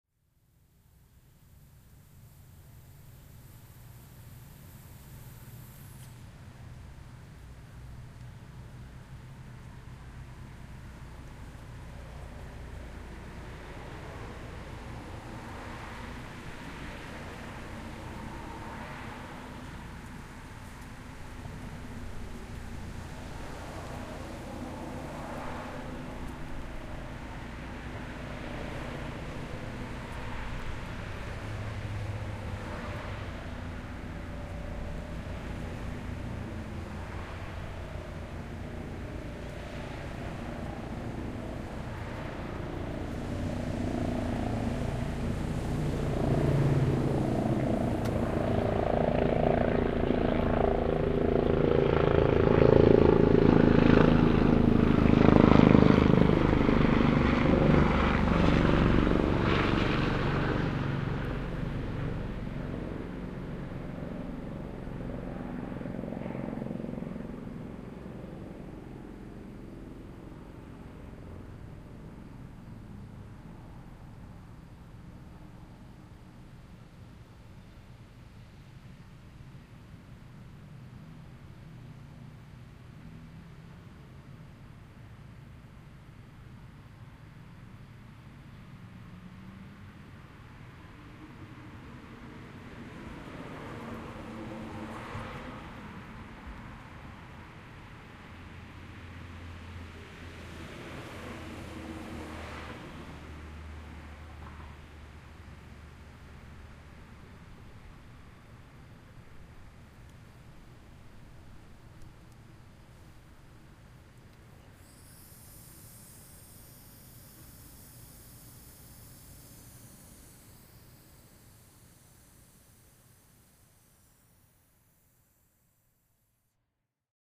Maybe it's a manhunt. Maybe it's a medivac emergency. Maybe it's a search party or a high-speed chase. Whatever it is, the helicopter passes right overhead. The faint sound of daytime crickets permeate the background.